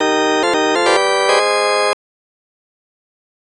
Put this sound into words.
1 - Unlock achievement for games
Simple sound made with LMMS. It might be used for an achievement in a game.
videogame, game, unlock